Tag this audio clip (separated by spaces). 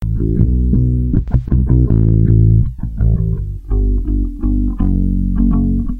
Groove Music